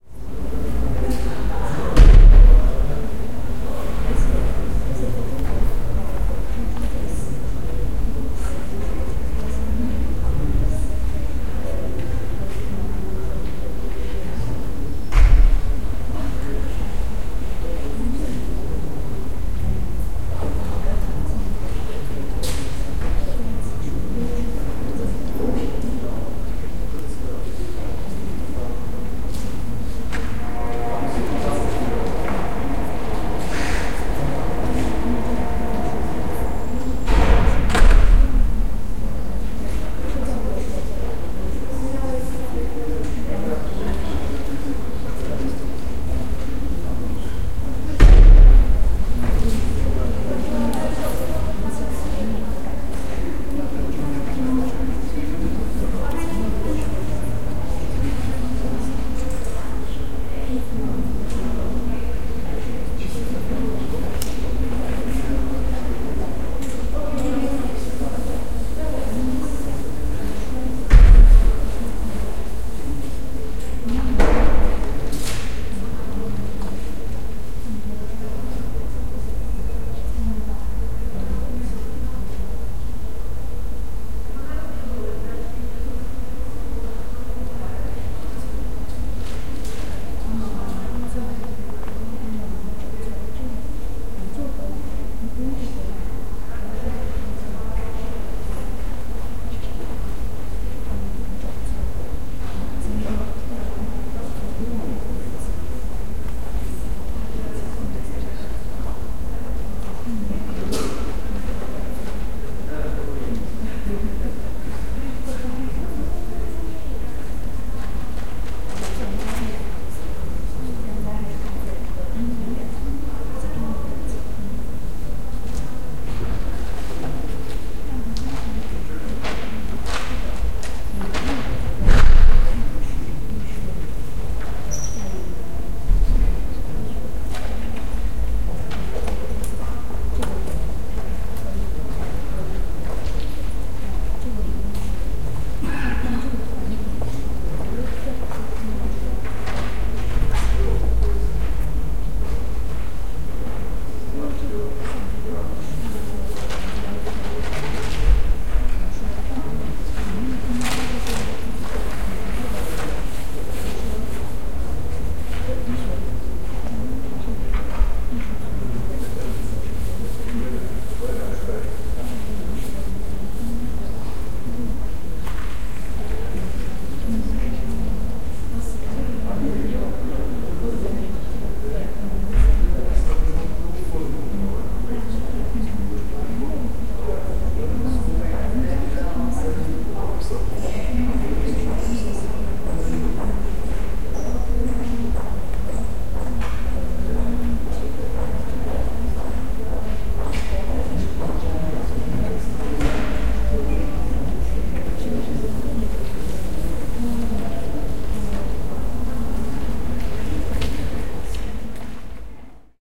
-134 OLOMUC calm university square doors strange gate Olomuc
Recording from a university square at Olomuc.
gate, talks